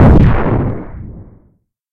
Standard explosion with a phaser
explosion, noise, phaser, white